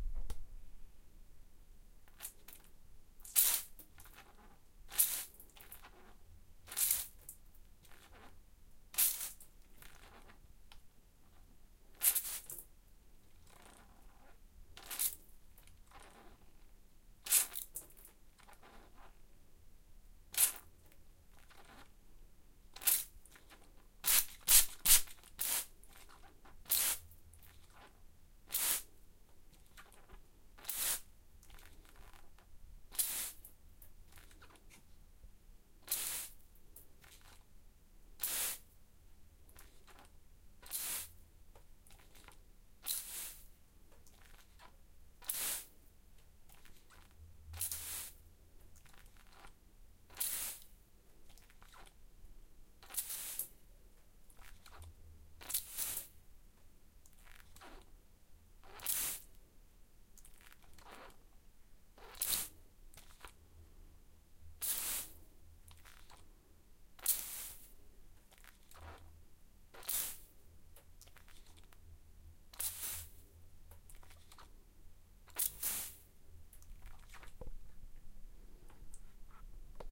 Simple plastic manual water sprayer, close, Zoom H4n
water, liquid, spray